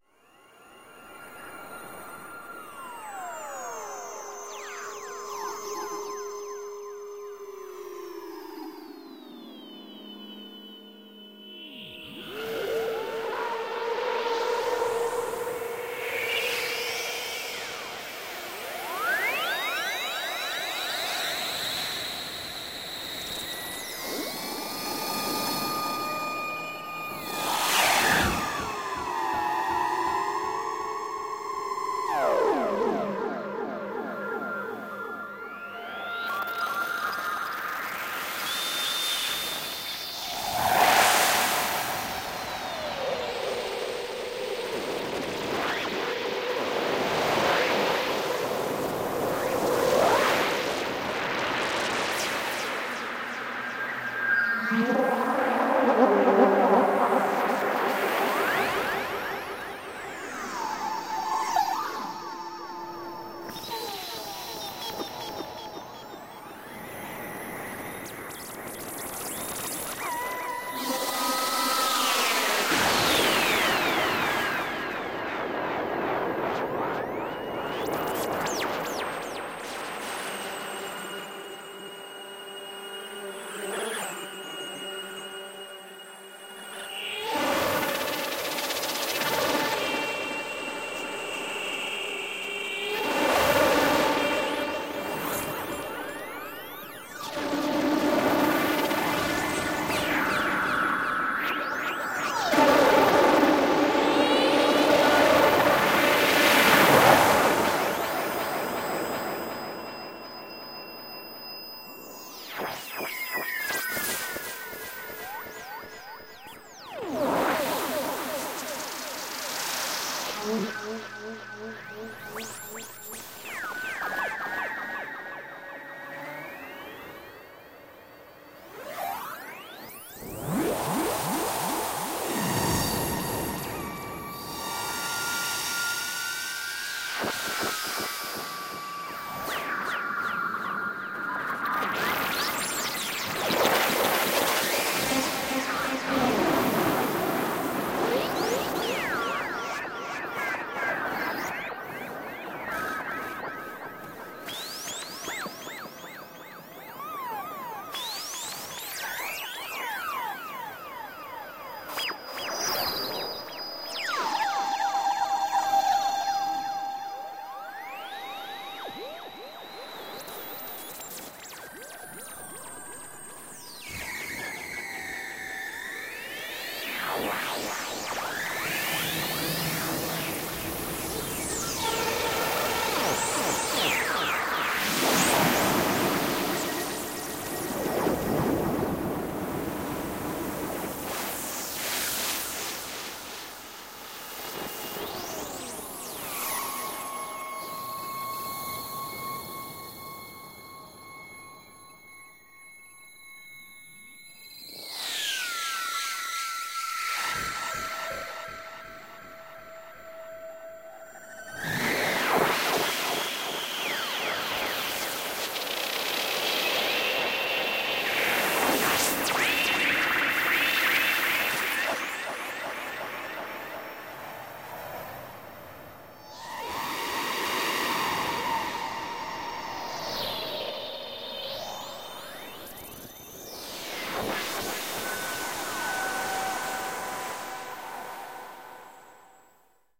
ESERBEZE Granular scape 19
16.This sample is part of the "ESERBEZE Granular scape pack 2" sample pack. 4 minutes of weird granular space ambiance. Space radio interference screams & storms.
drone, electronic, granular, reaktor, soundscape, space